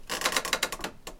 Creak Wood Floor 10
A sound of a creack on a wooden floor recorded on set for a short film.
This is one of the many, so check out the 'Creacks' pack if you need more different creaks.
Used Sony PCM-D50.